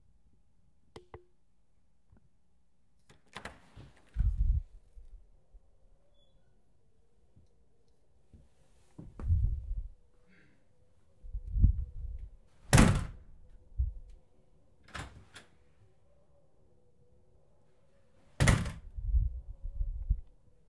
House door opening closing 01
Recording of a house door opening and closing.
Open, close, Door-knob, House-door